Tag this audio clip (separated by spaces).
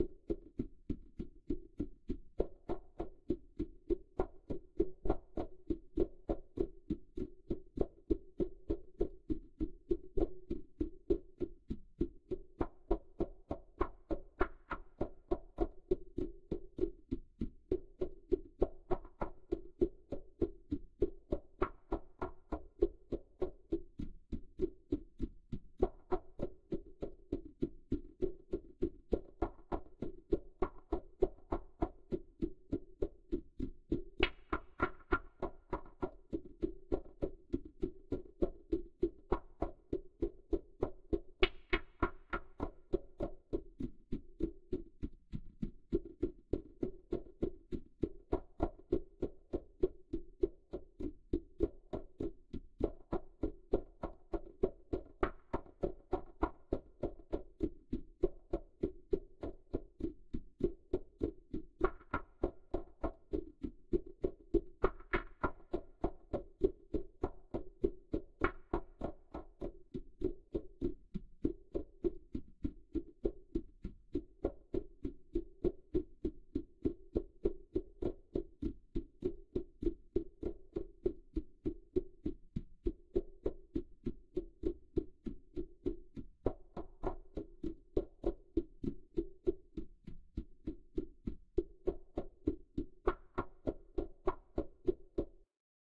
clock delphis fx loop tick ticking